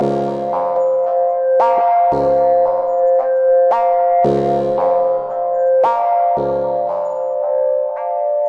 bouncing loop 113
A loop sequenced and created in Reason. This is a four-bar loop at 113 beats per minute.